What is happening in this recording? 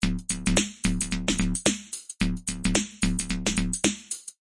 Experimental Beat Loop